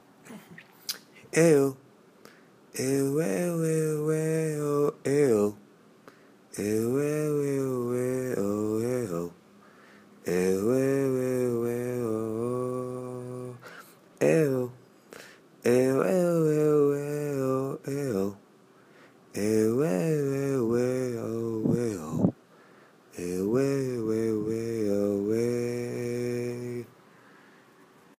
Brazilian Dream
Recorded on October 14, 2014. I had a dream that I was in a huge stadium in Brazil and a band with three women were singing on stage - and this is the chorus from the song, which I recorded in my voice on my iPhone as soon as I woke up.